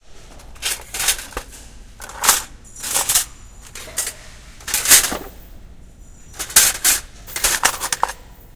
Moving around small 2" x 2" square pieces of brass sheeting in a cardboard box at the Box Shop art studio in San Francisco.
aip09, box-shop, brass, rustling, san-francisco, stanford-university